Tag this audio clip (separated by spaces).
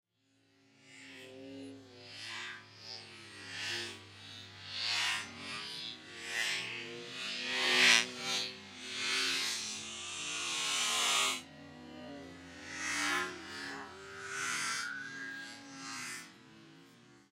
experimentalaudio f13 metallic FND112 robot noise